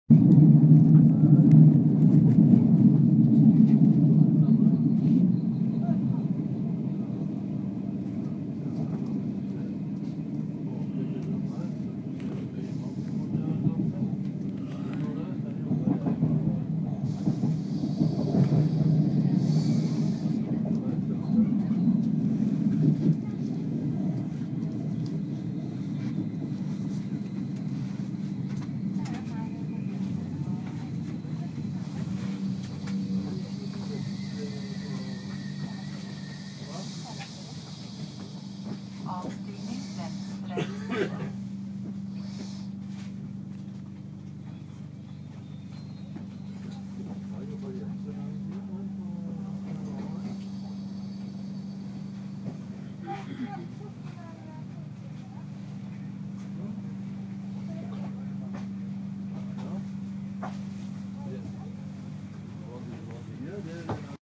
Field recording from Norwegian metro, with announcer saying 'doors opening on the left side' in Norwegian.